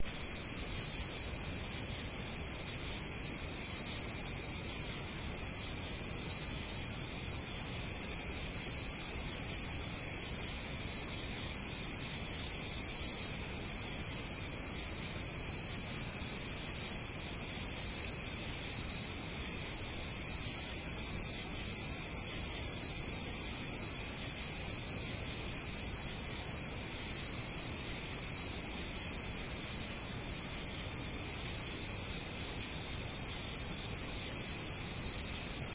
night sounds
Various night insects